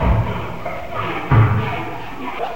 I recorded this either 10 or 20 years ago, probably, and have no idea
how the sound was made. Probably random noise in the house, and I found
this one interesting enough to keep. Have fun.